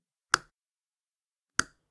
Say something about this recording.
MEDIUM SIZE TOGGLE SWITCH
Toggle switch, toggle on and off.
click, domesticclunk, electric, electricity, off, switch, switches, toggle